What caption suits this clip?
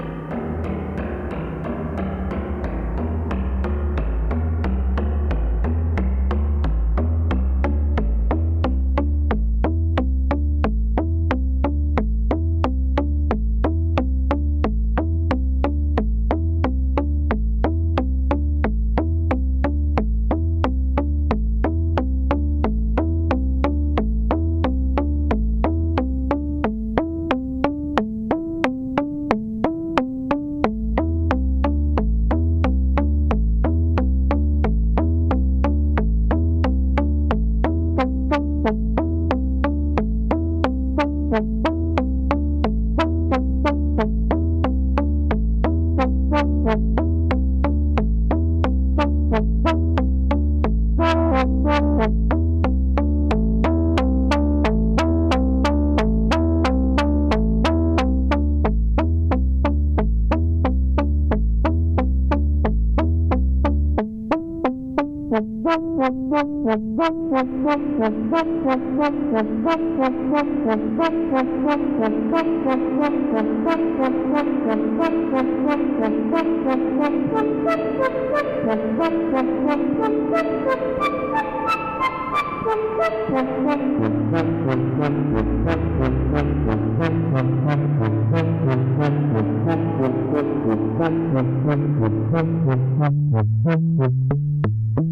Moog Grandmother Sequence 1
Moog Grandmother, plucky sequence in Am, 90bpm. You could make loops out of it, as the whole sequence was digitally clocked. Some interesting play with noise, filter, pulse width and the internal spring reverb of the synthesizer.
Recorded through an SSL Superanalogue preamplifier at line level, UAD-2 Apollo 8 ADC. No EQ, no compression.
Synthesizer, Moog, Grandmother, Synth, Sequence, Am